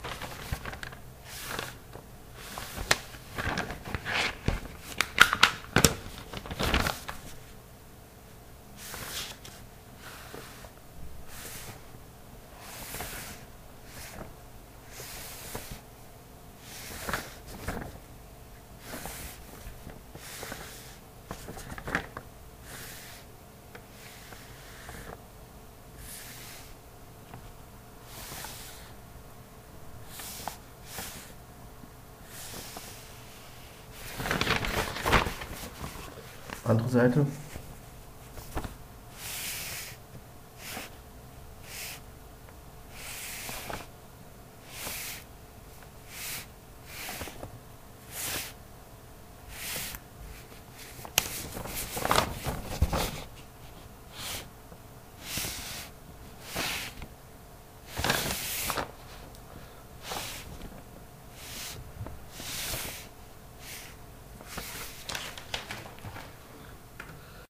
fh Paper Swipe 01 01
swiping paper over table surface